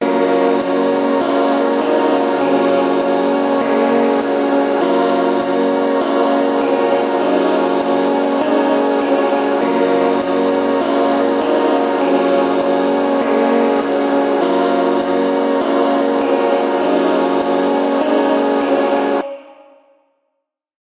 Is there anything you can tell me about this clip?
ethereal-loop
Short loop that has an ethereal feel to it.
angelic, breath, ethereal, heavenly, loop, mystical, synths, vocals